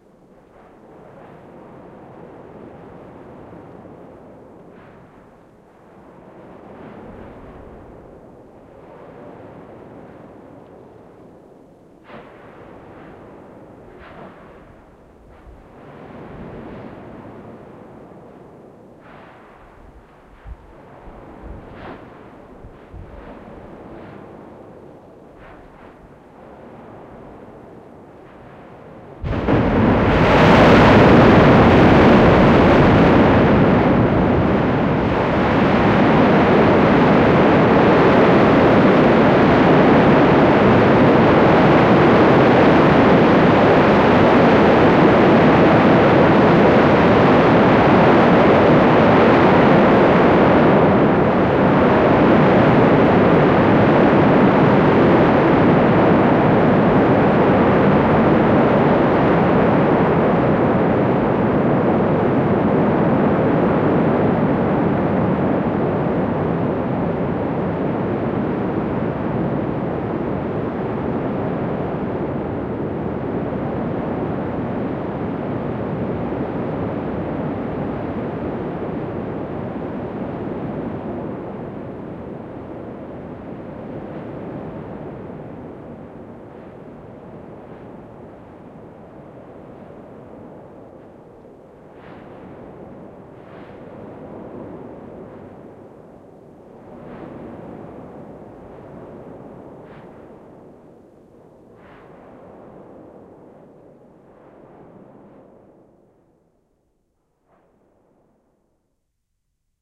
In the first part of the recording you hear the boiling, glowing lava in the crater, followed by a (small) eruption that made me jump in fear